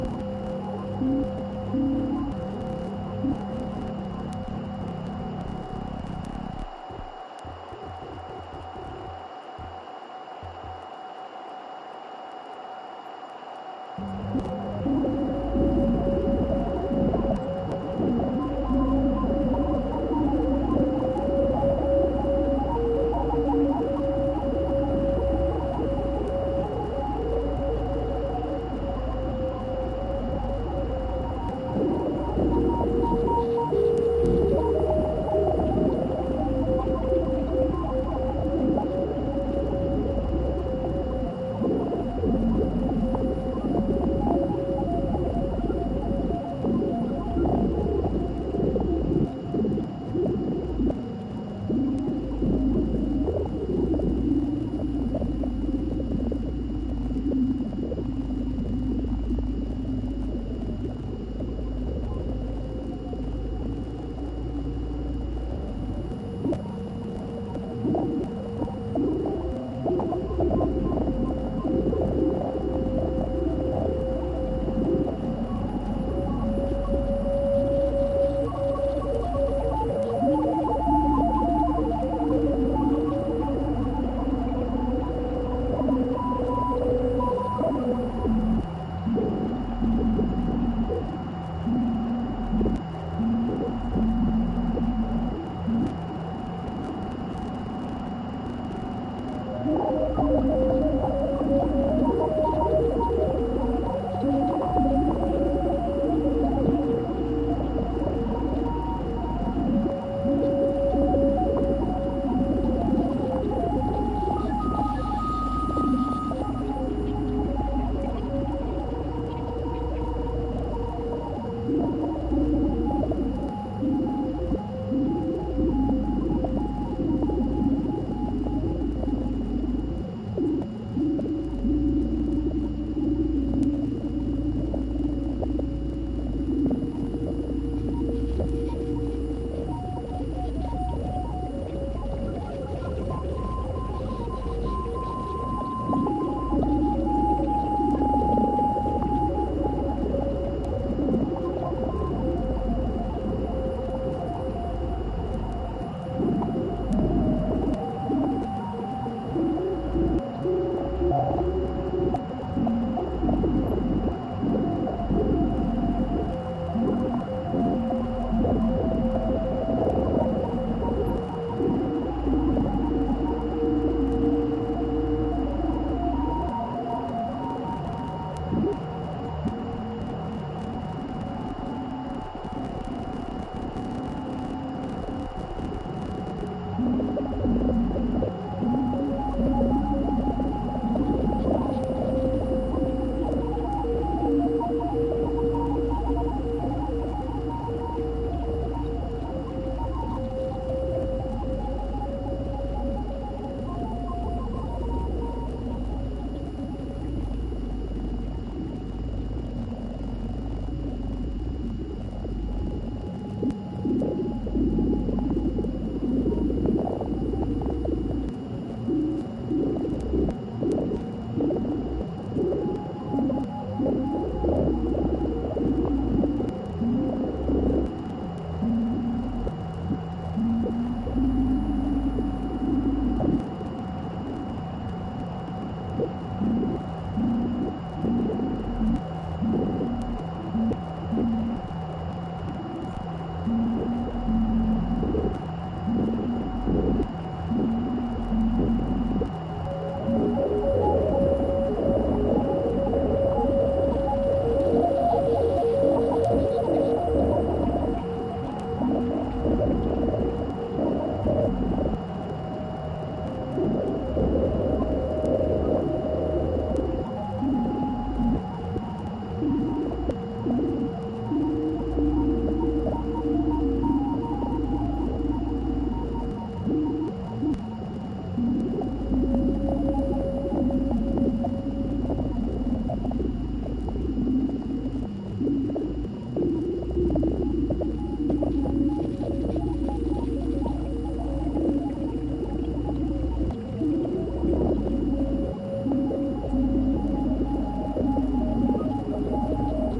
radio am 01
Fully synthesized AM/shortwave abstract radio sounds. This is not recording, but track made with VST synthesizers and effects.
Sounds are abstract, there is no voice or meaningful transmission behind them. They recall overall atmosphere of shortwave radio.
interference, shortwave, radio-static, radio, radiostation, am, static, am-radio, noise